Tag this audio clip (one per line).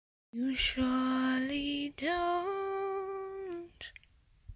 female
girl
lyrics
request
sing
singing
vocal
voice